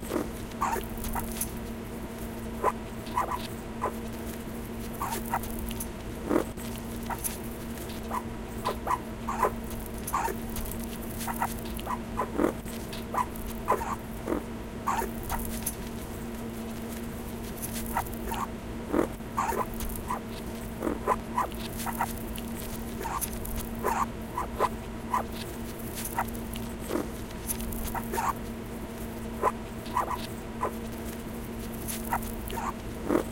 Recorded using a Zoom H2. This is my Toy Fox Terrier dog; the file is heavily edited using Audacity filters and modifications.
animal, barking, dog, mechanical, whine, yip